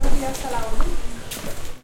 01TOMA 5 Pasaje Hernández VOCES Buenos dias a la orden Jorge Díaz
Sonido capturado para el proyecto SIAS-UAN con el semillero de la Maestría en Arte Sonoro UAN, como parte del trabajo de patrimonio sonoro. Este sonido se capturó con una zoom H6. Trabajo realizado en mayo 2019